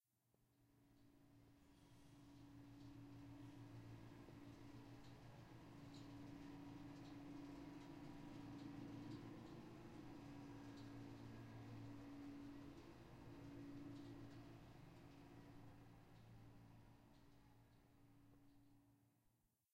Walking past a very loud drinking fountain's water cooler. Other minor ambient noise is mostly overpowered. Recorded on Stanford University campus, CA.